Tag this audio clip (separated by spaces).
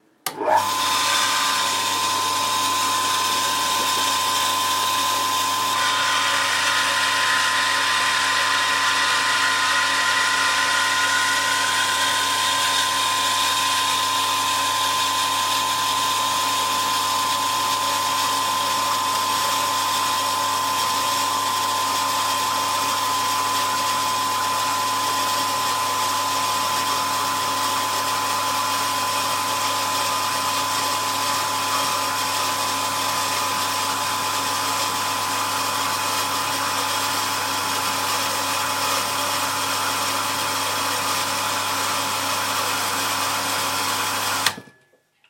motor engine pump noise industrial